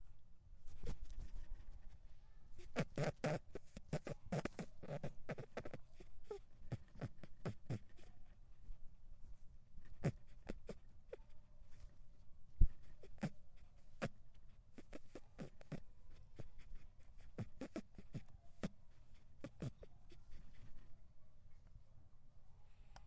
Female rabbit.
Tascam DR-07MKII
bunny, breathing, rabbit, animal, pet